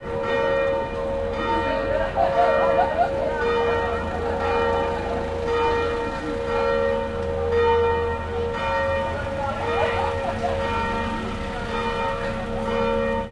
fr9 07 bells laugh f
The sound of bells and laughter recorded in Bormes les Mimonsas France on minidisc.
ambience, atmosphere, bells, field-recording, france, voice